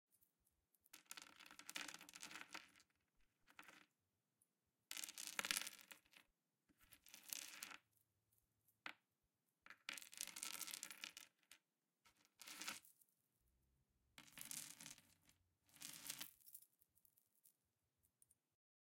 Rosary beads picking up and putting down
A string of rosary beads being picked up and put down on a wooden table. Recorded using Zoom H6 with an XY capsule.
OWI, Drag, Rosary, Beads, Wood